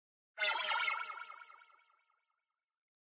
explosion beep kick game gamesound click levelUp adventure bleep sfx application startup clicks